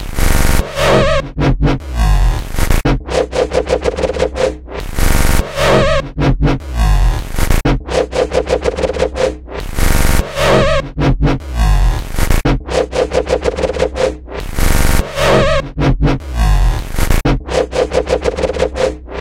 100 BPM Dubstep Wobble Bass
100 bpm Wobble Bass
100, loop, sub